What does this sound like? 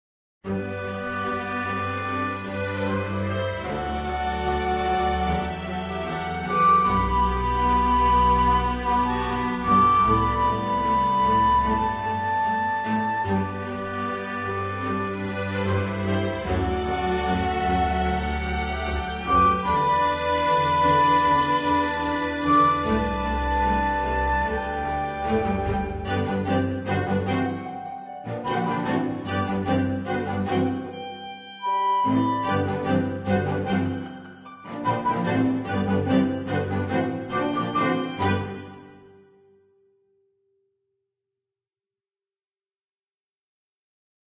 ashton manor stings
ashton, stings, manor